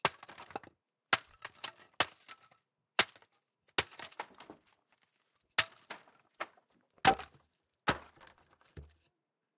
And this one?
Wood panel board debris sharp impact hard
Part of a series of sounds. I'm breaking up a rotten old piece of fencing in my back garden and thought I'd share the resulting sounds with the world!
board, Wood, impact, sharp, debris, hard, panel